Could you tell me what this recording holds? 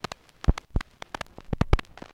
Various clicks and pops recorded from a single LP record. I distressed the surface by carving into it with my keys and scraping it against the floor, and then recorded the sound of the needle hitting the scratches. Some of the results make nice loops.